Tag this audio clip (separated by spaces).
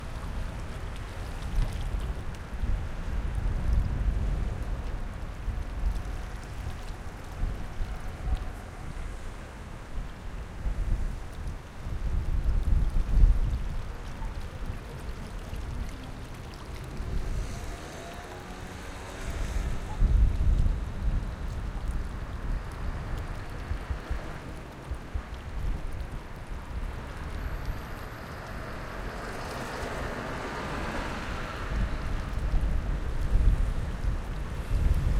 Monotonous Traffic Water Wind